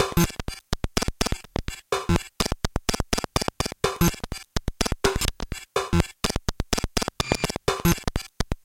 125BPM Drum loop - circuit bent Casio synth - unprocessed
125 bpm drum loop made out of circuit bent Casio synths recording. Nothing else besides a tiny bit of compression was added.
techno, panning, noise, glitch, left, 125bpm, casio, bpm, loop, right, circuit, beat, hard, drums, bent, circuitry, distorted, synth, drum, electronic